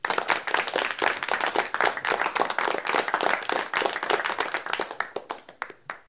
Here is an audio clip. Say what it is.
Small audience clapping
applauding, applause, claps